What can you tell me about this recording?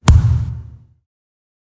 VSH-11-fist-thump-metal pipe-short
Metal foley performed with hands. Part of my ‘various hits’ pack - foley on concrete, metal pipes, and plastic surfaced objects in a 10 story stairwell. Recorded on iPhone. Added fades, EQ’s and compression for easy integration.
fist, slap, hit, ringing, human, ring, hits, percussion, smack, slam, knuckle, pop, thump, crack, metallic, hand, kick, metal, metalpipe, metal-pipe